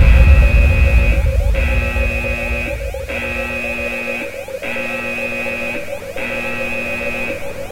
alarm fatal
A composite alarmsample. Loop this sample on a huge stereo with decent volume and report back :)The sample is optimized for dynamics, so it's not overcompressed.The other sample in this pack also includes a firealarm bell.
rumble, alarm, massive